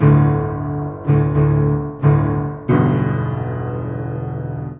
piano charge 2
Playing hard on the lower registers of an upright piano. Mics were about two feet away. Variations.
doom, low-register, piano, play-hard